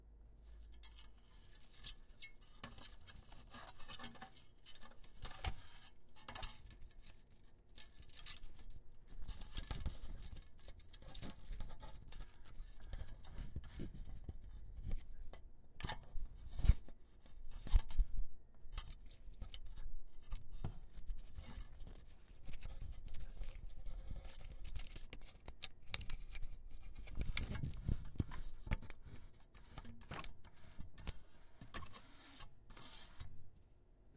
reel to reel tape machine tape and spool handling threading1
handling, machine, reel, spool, tape, thread, threading, up